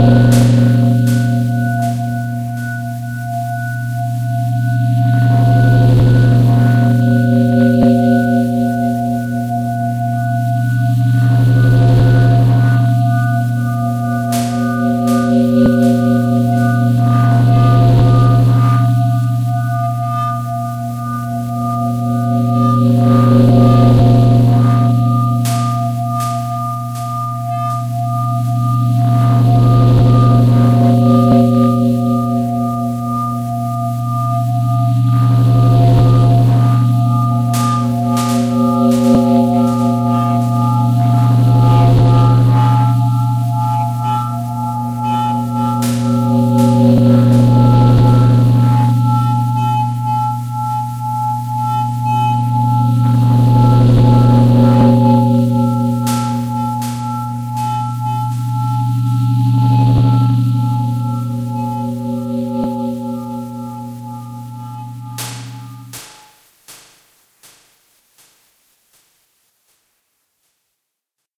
Shadow Maker-Living Room
What you hear is the sound of an old mystic Engine, or something else, i don´t know. I made it with Audacity. Use it if you want, you don´t have to ask me to. But i would be nice if you tell me, That you used it in something.
Creature, Nightmare, Ghost, Ambiance, Free, Hall, Scary, Ambience, Halloween, Ambient, Atmosphere, No, Entrance, Sound, Cellar, Drone, Light, Spooky, Evil, Horror, Engine, Creepy, Maker, Shadow, Dark